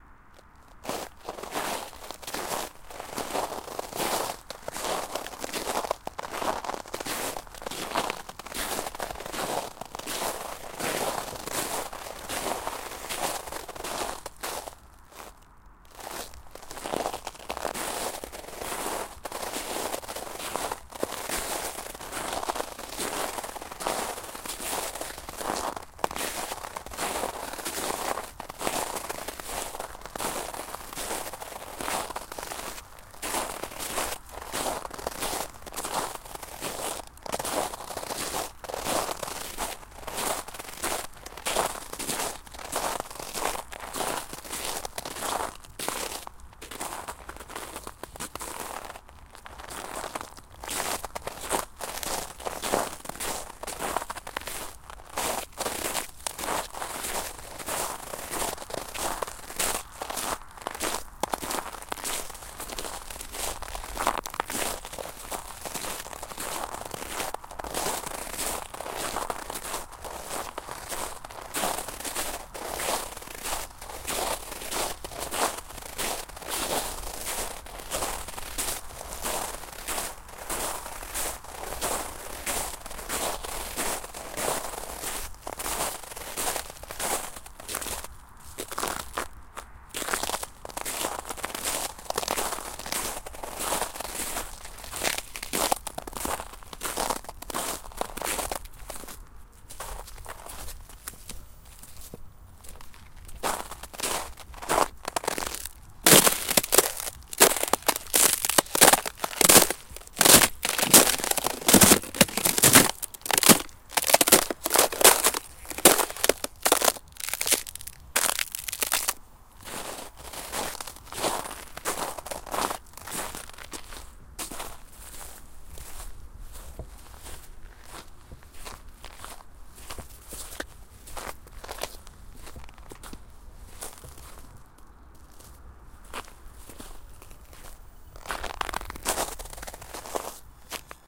Snow steps Tallinn 21.01 near sea
Recorded Tascam DR44WL at winter morning near baltic sea
walk, footstep, walking, Winter, foot, step, shoe